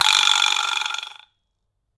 A single hit from vibraslaps: Recorded in mono with an AKG 414, Fredenstein mic amp, RME Fireface interface into Pro Tools